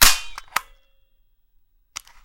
staple gun
stable gun sound